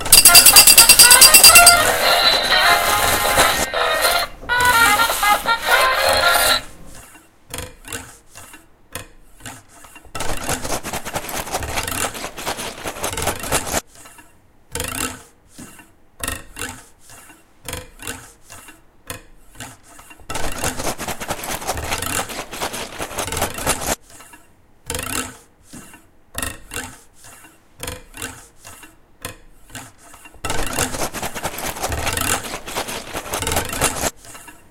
SoundScape GPSUK Shania,Esel,Brian 5W
galliard, soundscape